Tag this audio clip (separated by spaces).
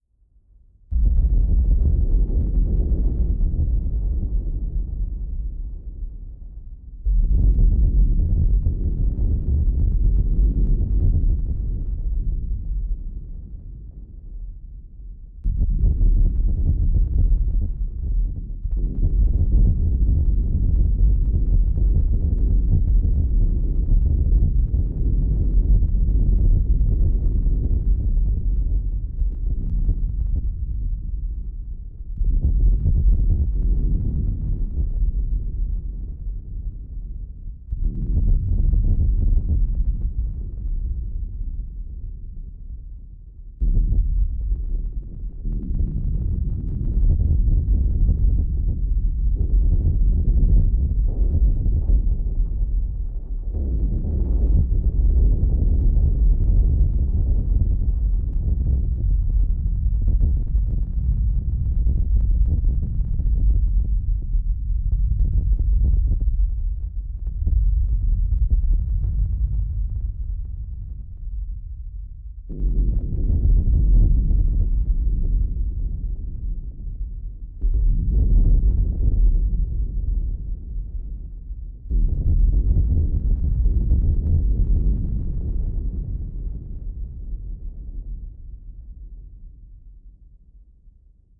field-recording lightning nature rolling-thunder thunder thunder-storm thunderstorm weather